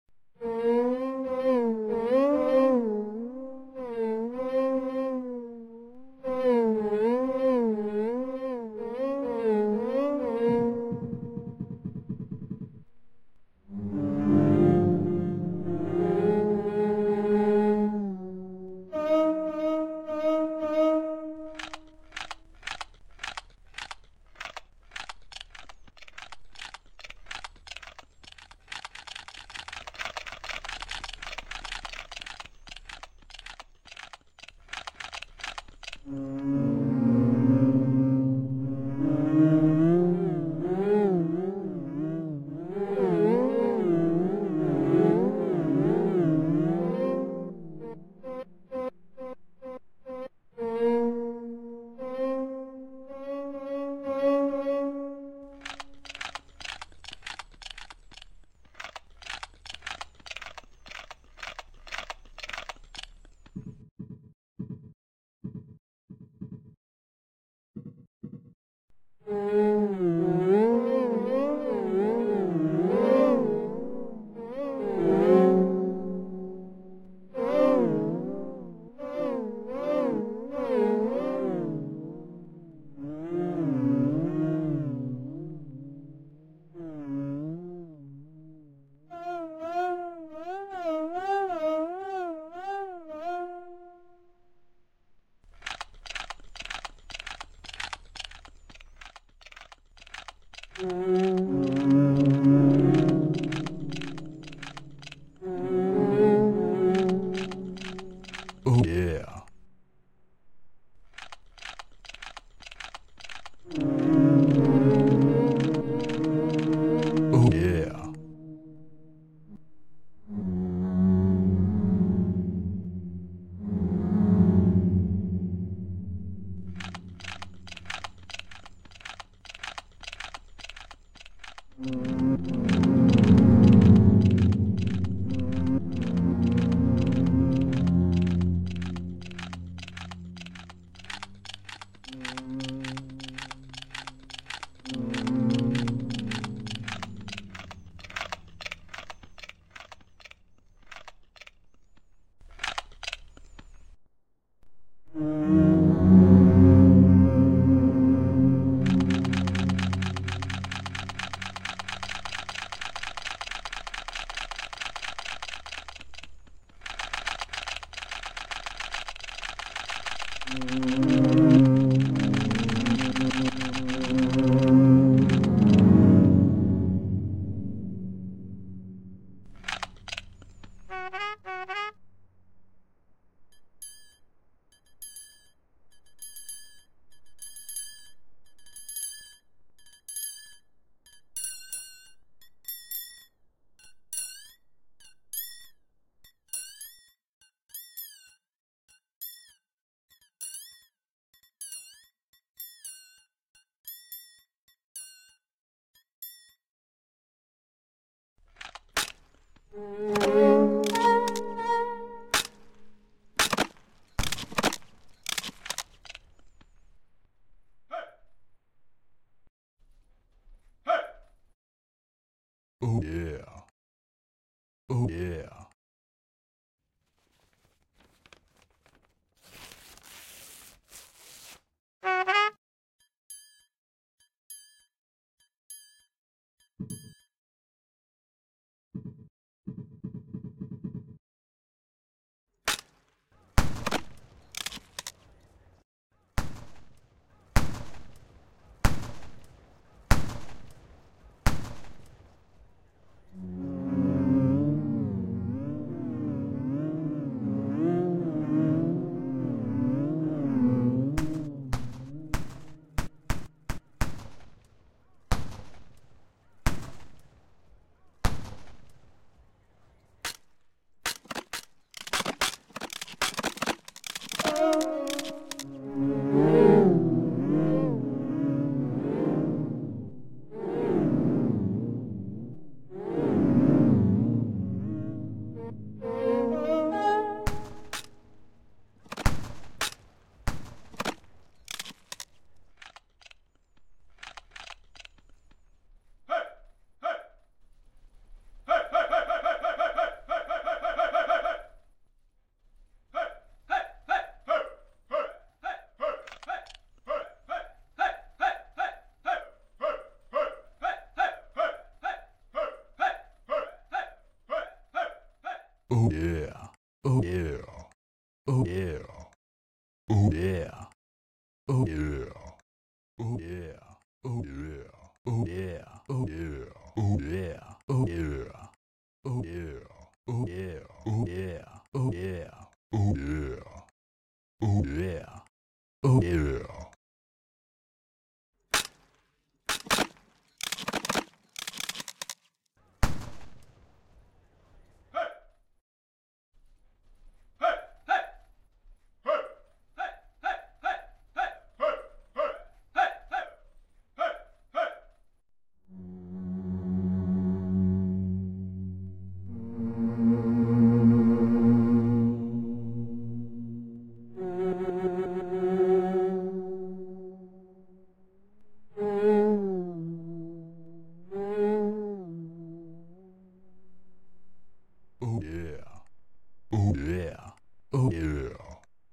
horror theme
school, cool, synthesized, project, crunch, music, saturday